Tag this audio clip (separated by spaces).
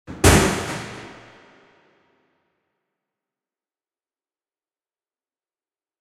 bang close echo